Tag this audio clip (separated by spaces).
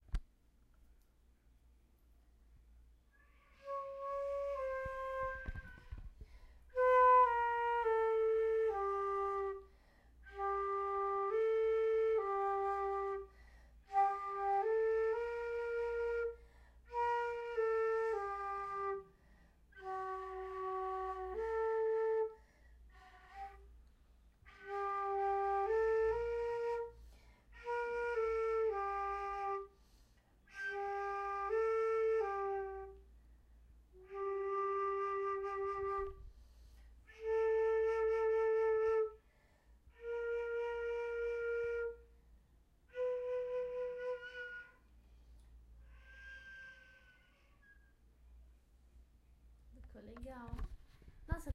music wind-instrument flute practice CompMusic transverse